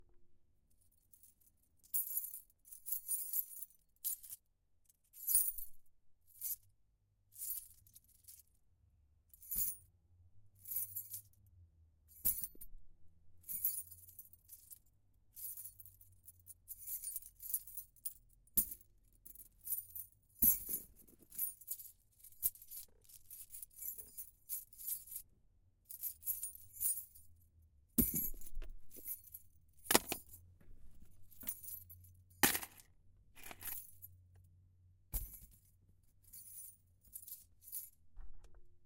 Keys - keychain jingling and falling on soft surfaces
Car keys being shaken and dropped on a vehicle seat.
drop, dropped, fall, falling, jingle, key, keychain, keyring, keys, metal, rattle, shake